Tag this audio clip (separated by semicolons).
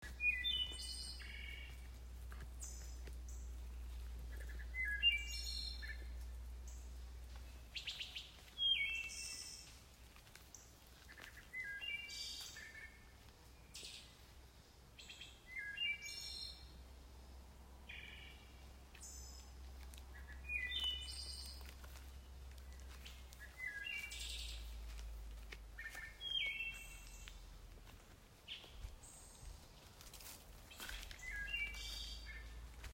beautiful; bird; birds; birdsong; calls; field-recording; nature